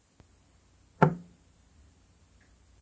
Knock wood
Knock on the wood
knock, knocking, wood